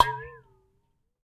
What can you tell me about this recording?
Foley pitchy pot top 07
Recorded the pitchy sound the top of a pot made when being submerged and taken out of water. Recorded on my Zoom H1 with no processing.